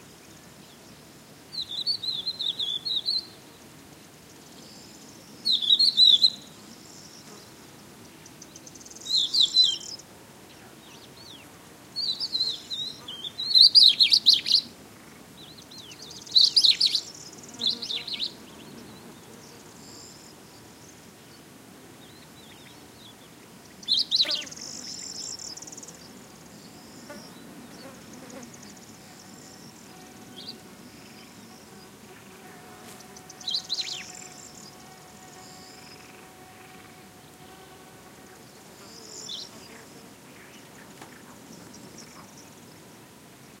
20110320 crested.lark
close call of Crested Lark (Galerida cristata) with insects in background. Recorded at the Donana marshes, S Spain. Shure WL183, Fel BMA2 preamp, PCM M10 recorder
birds, field-recording, spring, crested-lark, south-spain, donana, marshes